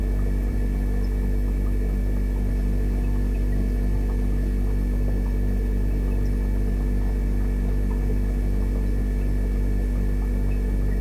Fridge buzz (loop)
Loop of buzzing fridge in my kitchen recorded with Tascam Dr-05 few years ago.
buzz, buzzing, ele, electric, electrical, electricity, fridge, hum, noise, power, refrigerator